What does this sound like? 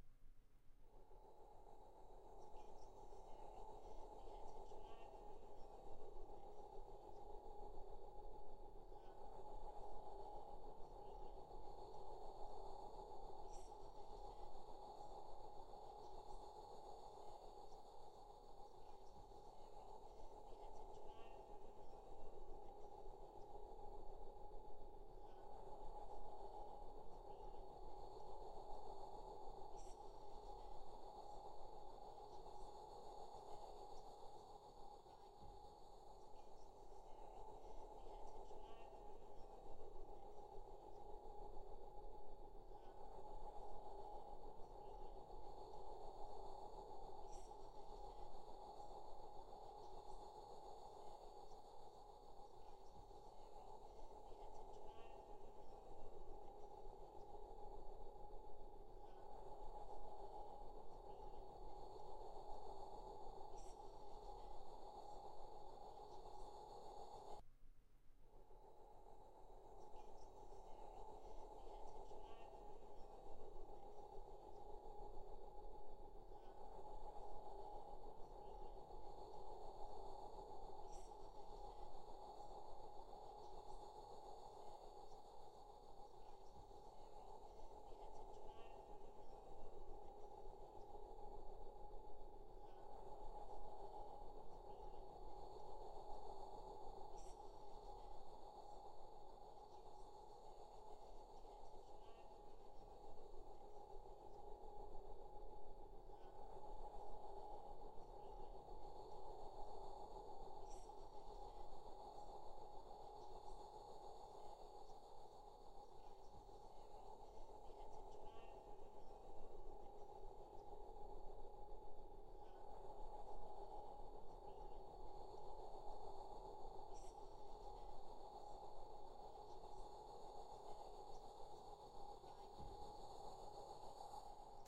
continuous noise made with the roof of the mouth, and a mysterious recorded voice mixed in at very low level. Meant to be eerie.
space, breath, cosmos, voice, effect